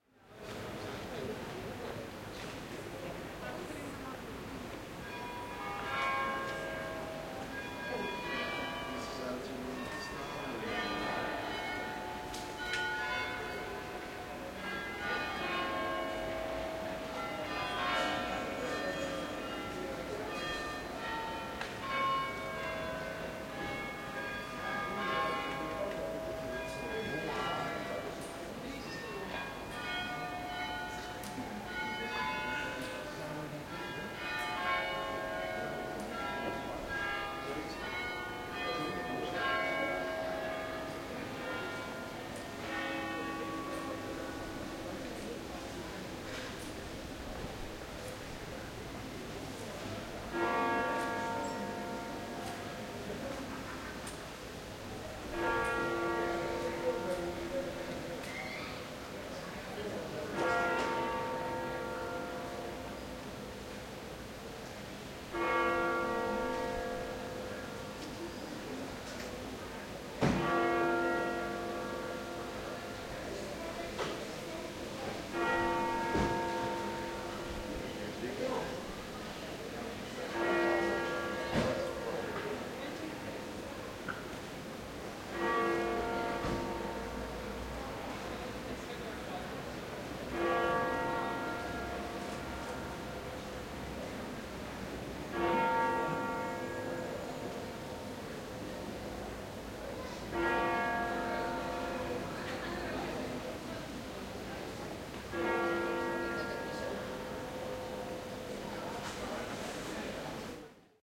The chimes of the Westerkerk in Amsterdam (nl) play a tune before the big bell hits twelve. Meanwhile some boys are commenting Steve Blooms photographs in the exhibition "Spirit of the Wild" around the church. A woman on crutches is passing and the four doors of a car (Renault Scenic) are shot. You also hear the wind in the trees and more urban life in the background. Recorded with an Edirol cs-15 mic plugged into an Edirol R09 the 24Th of June 2007 at noon in Amsterdam (nl)